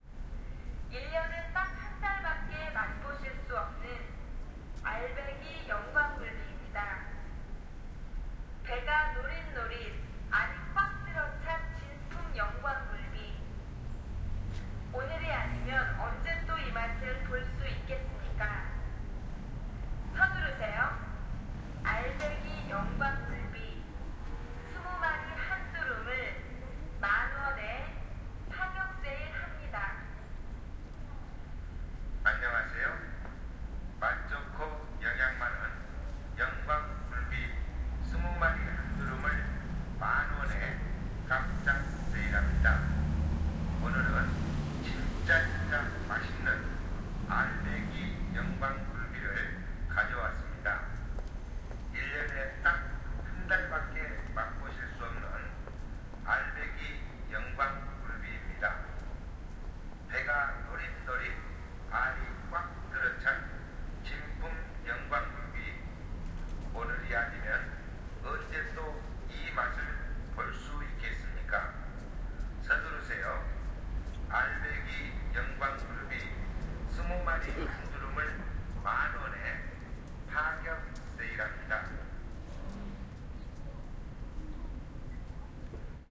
0087 Fish seller female and male
Fish seller female and male with a speaker. Traffic background
20120118